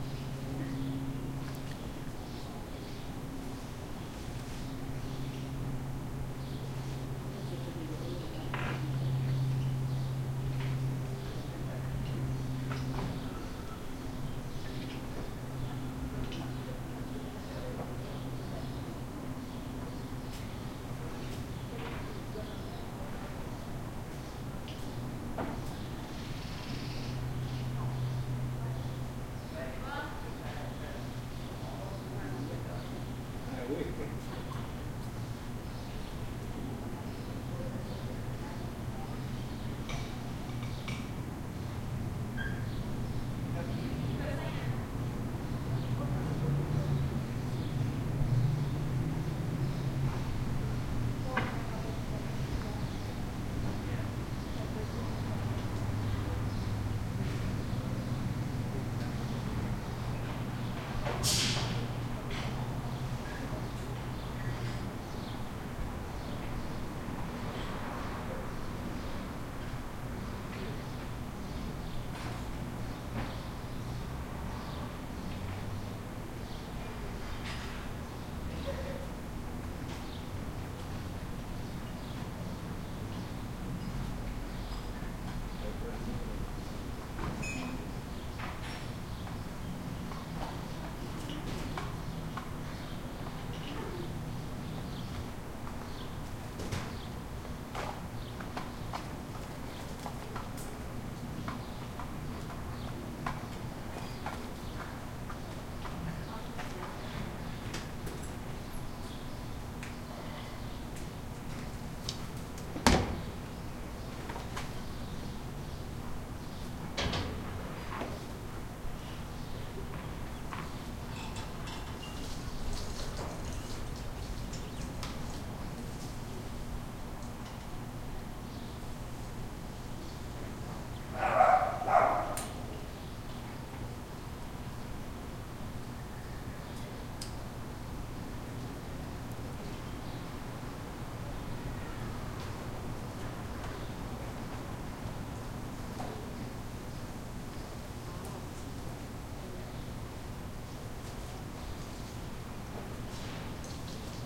backyard day light people activity distant traffic Verdun, Montreal, Canada
Canada, backyard, people